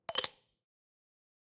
Glass lid on
The sound of a glass lid being removed from a jar. Achieved with glass, a Zoom h6 and some small EQ and layering.
Composer and Sound Designer.